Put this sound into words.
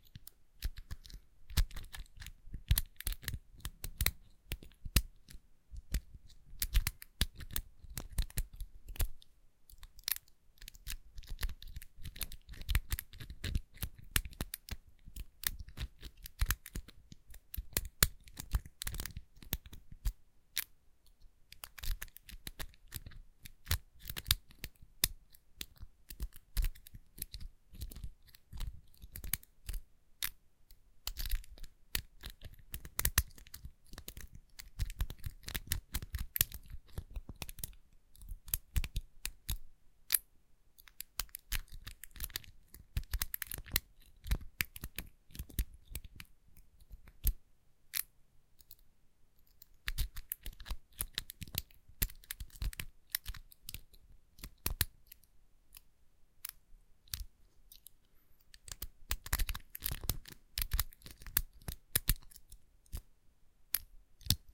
Lock 3 - Lock Picking
Pad lock being picked
keys, lock, padlock, unlocking, open, locks, key, locking, door, shut, close, unlock, pick, closing, gate, picking, opening